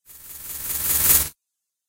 A Sci-Fi sound effect. Perfect for app games and film design.Sony PCM-M10 recorder, Sonar X1 software.